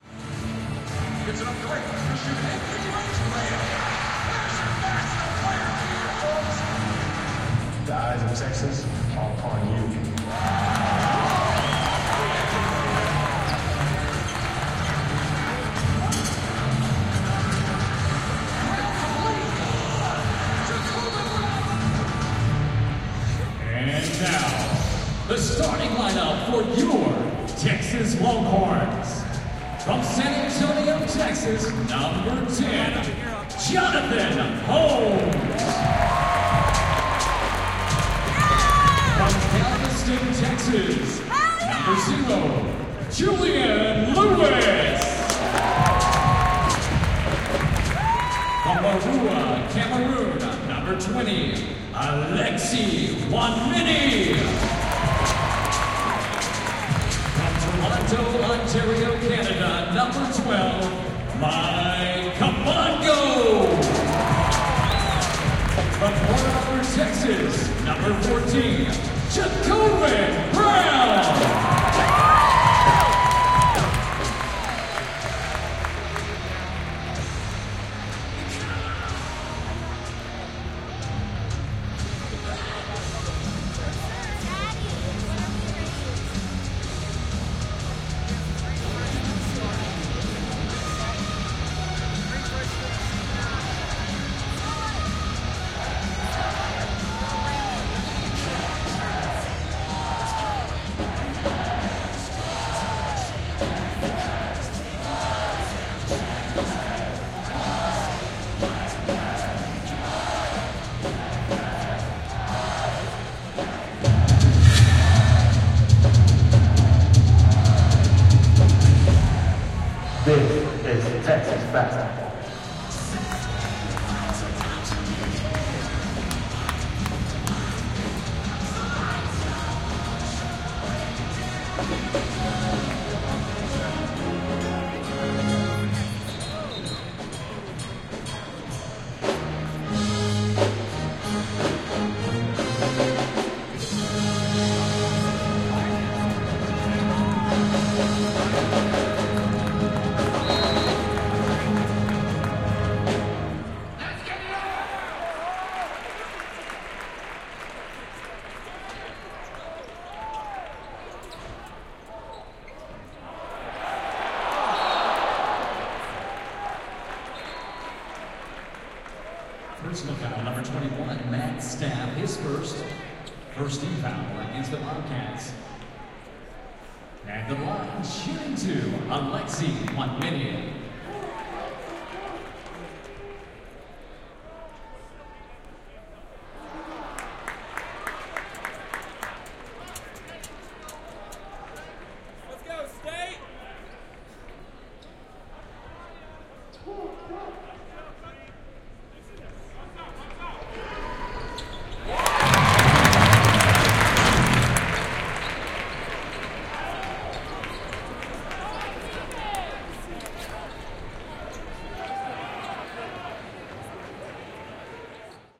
This is a binaural audio recording, so for most true to nature audio experience please use headphones.